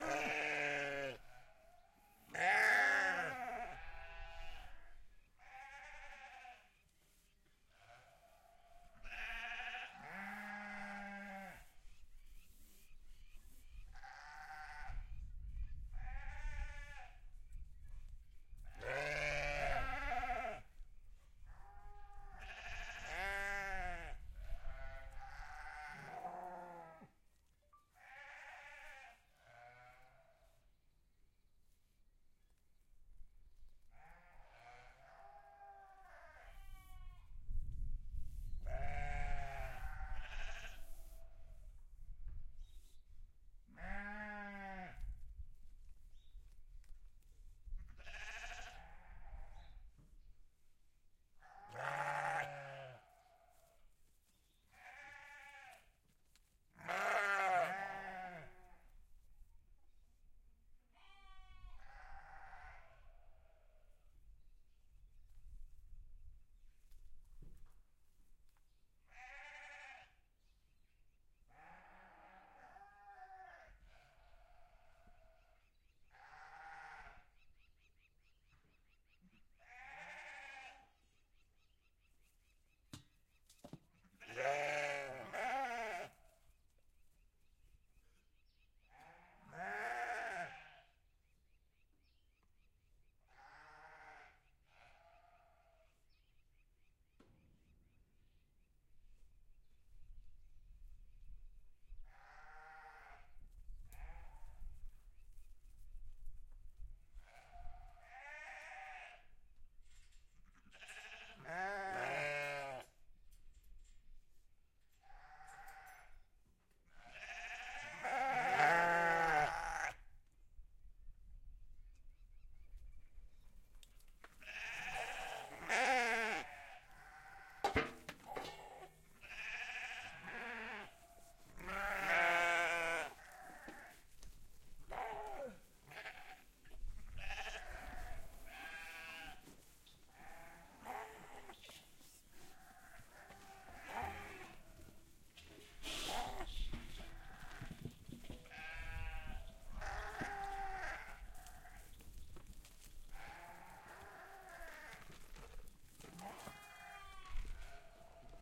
Ambiance sounds of a sheep farm